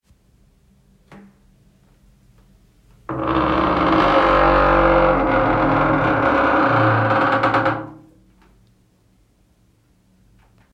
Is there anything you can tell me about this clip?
Wooden door squeak 1
Fieldrecording of a wooden bathroom door squeaking. Recorded using iPhone SE internal microphone
Door, Squeak, Wooden, close, fieldrecording, open